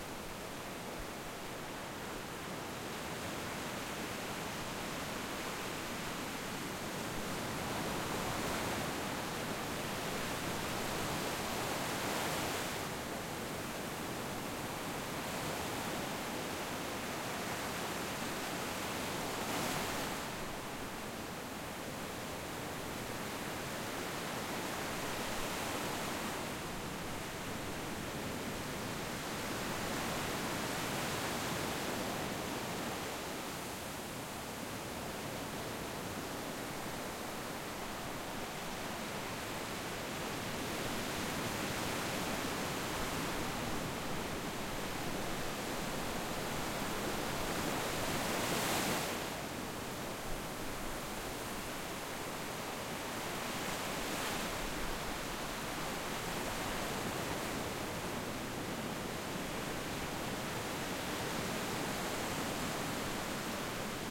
Waves Up Close
Recorded in Destin Florida
Close-up of waves rolling onto shore.
beach, breaking-waves, close, coast, field-recording, lapping, nature, ocean, relaxing, sea, sea-shore, seashore, seaside, shore, shoreline, splash, surf, tide, up, water, wave, waves